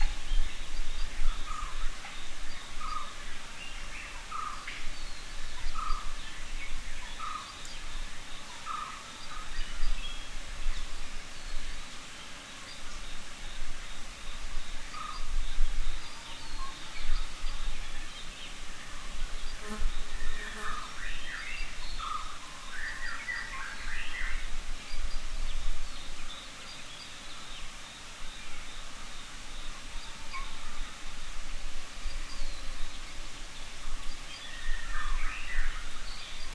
Forest Sounds Stereo
Short audio of forest sounds recorded from Nilgiri forests in the Western Ghats in India. Birds and general ambience. A fly buzzes close to the mic once.
ambiance, ambience, ambient, bird, birds, birdsong, field-recording, forest, india, morning, nature, nilgiris, spring, western-ghats